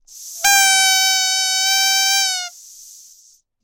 Squeaky Toy, high pitched, squeze, squeak, squeal, in, long-002
A rubber dog toy chicken being slowly squeazed to let out a long shrill squeal and lots of air escaping
dog, plastic, rubber, squark, squeak, toy